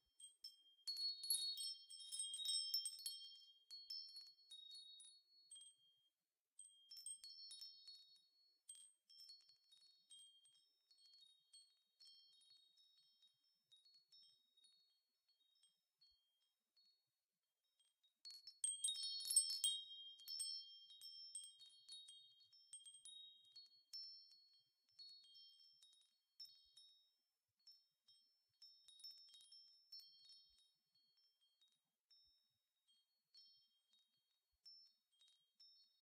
After 12 years it was time to contribute to this wonderful website. Some recordings of my mother's wind chimes.
They are wooden, metal, or plastic and i recorded them with a sm7b, focusrite preamp. unedited and unprocessed, though trimmed.
I'll try to record them all.